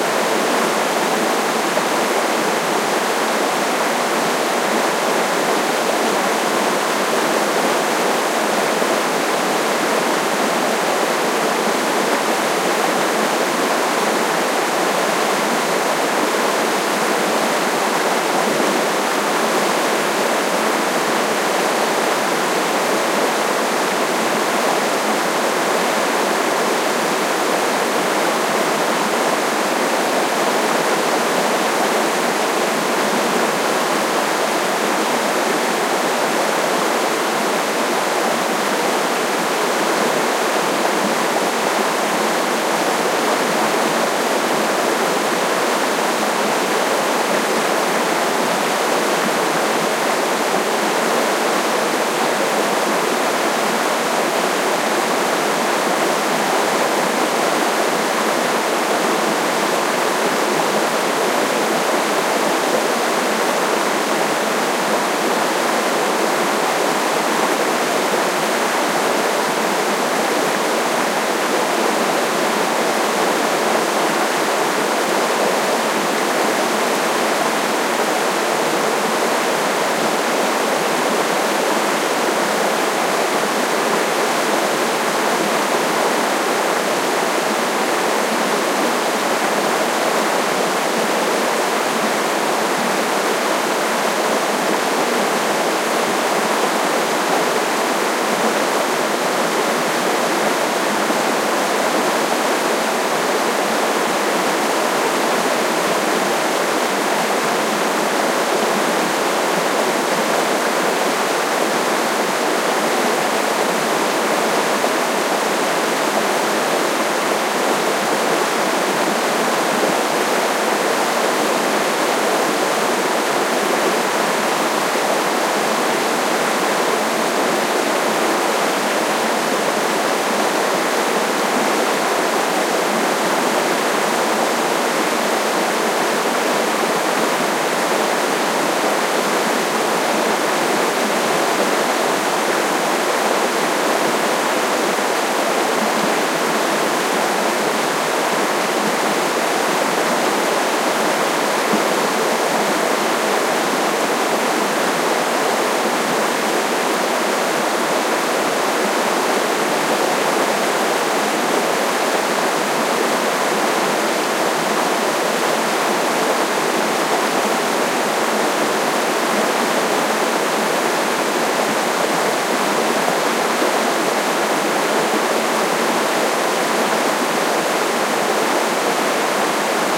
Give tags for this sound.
creek field-recording river water

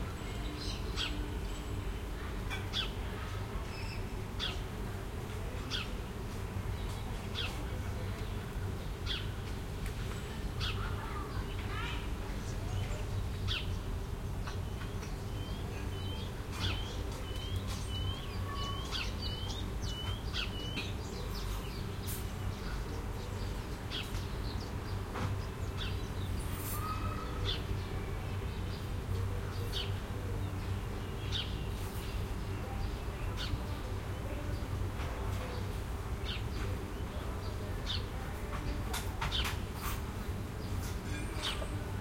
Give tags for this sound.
atmos birds children suburban trees wind wind-through-trees

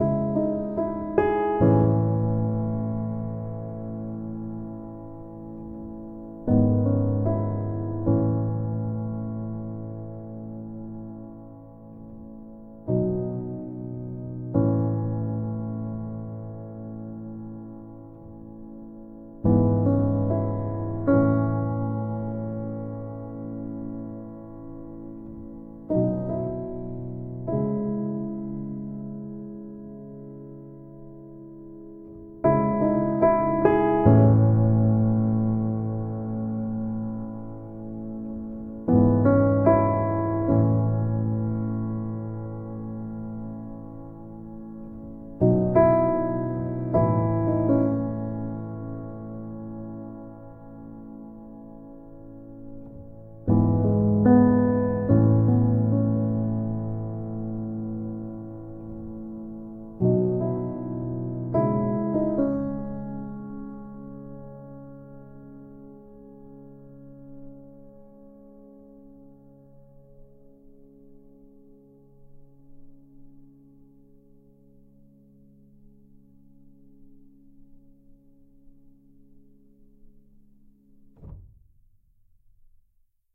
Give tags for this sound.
ambient atmosphere Background Beautiful Beautiful-piano-melodies cinematic Emotion Emotional-background-music Emotive-gentle-piano-melodies Emotive-piano-music Film Film-soundtrack-music Gentle Gentle-instrumental-music Instrumental into melancholic melody movie outro piano podcast Podcast-background-tracks Relaxing-piano-tunes Sadness Sad-piano-tracks Sad-scene-accompaniment score slow

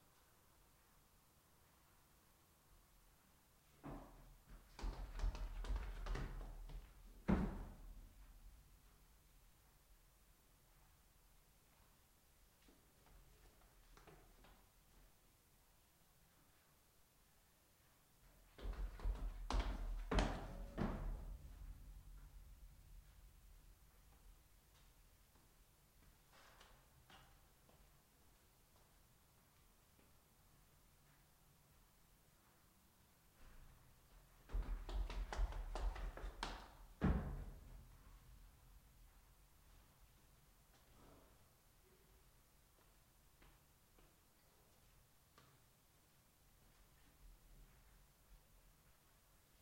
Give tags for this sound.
running staircase footsteps stairway stairs

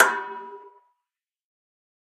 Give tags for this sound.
beat ceramic clap drums lo-fi metallic percussion percussive resonant sample snare

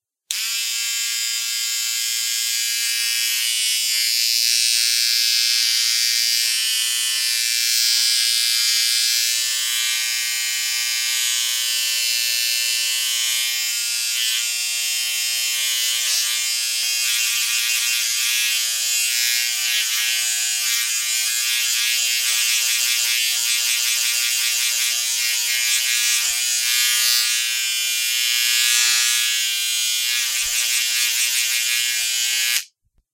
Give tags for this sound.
buzzing shaving electronic

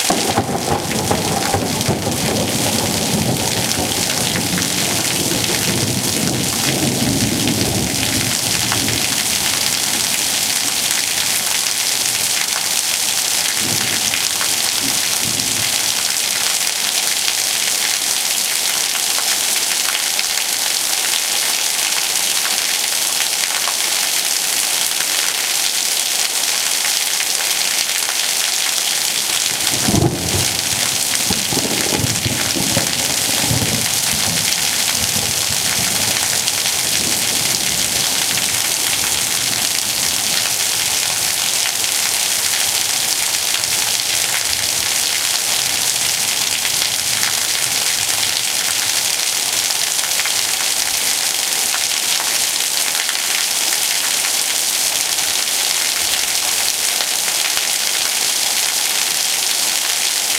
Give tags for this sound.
rain field-recording thunder

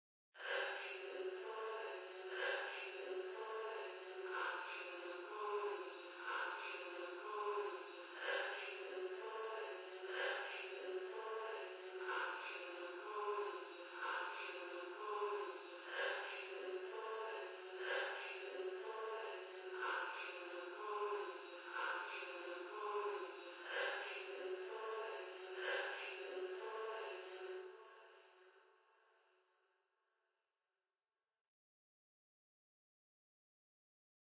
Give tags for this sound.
drama sing fearful acapella phantom haunted nightmare spooky gothic ghost

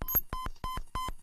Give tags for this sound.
idm bend circuit glitch bent sleep-drone strange circuitry noise tweak bending squeaky

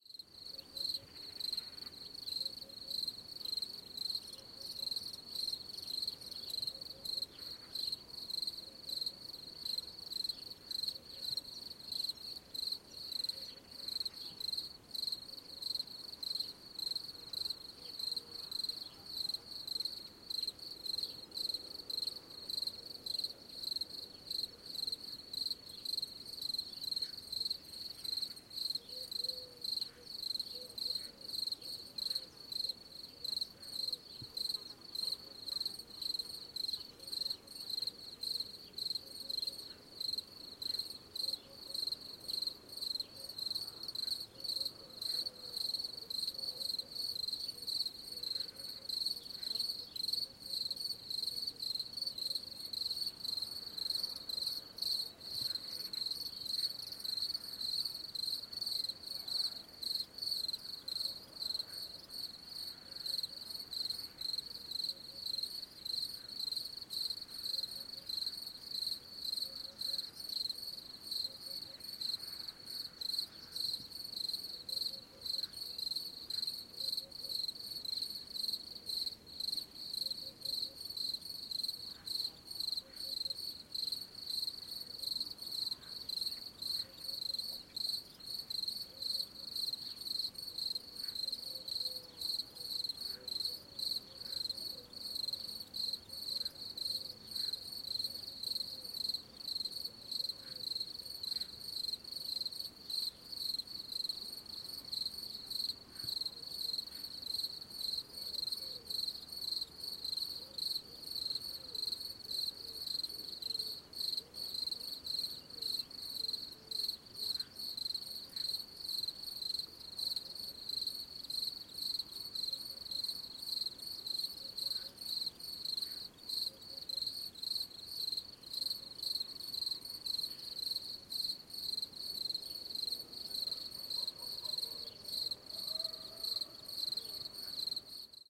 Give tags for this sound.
ambiance country crickets france frogs gers night turtledove

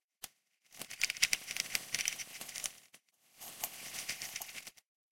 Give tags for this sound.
note-counter money-counter tape paper counter reel-to-reel tape-measure notes money

